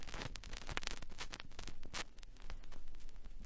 In an attempt to add warmth to my productions, I sampled some of the more distinctive sounds mostly from the lead-ins and lead-outs from dirty/scratched records.
If shortened, they make for interesting _analog_ glitch noises.